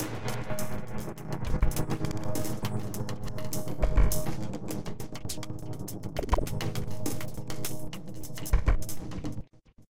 Drumloop with gong (in pain)
remix of 'drumloop with gong' (also available), not so static anymore.
drums, glitch, loop, mangled